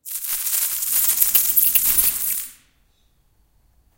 Skittering bugs
Just a quick little background noise I made. Includes skittering, and high pitched chirping.
ambient, dungeon, insects, ambiance, creepy